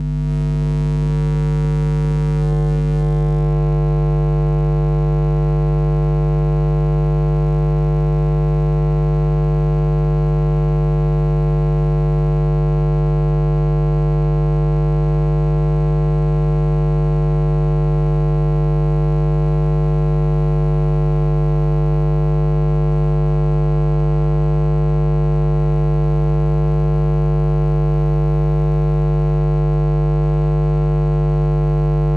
Electricity Ambience 2
amp, arc, ark, audio, buzz, design, effects, electric, electrical, electricity, fuse, glitches, laboratory, ninja, plug, shock, socket, sound, spark, sparkling, sparks, tesla, volt, voltage, watt, zap, zapping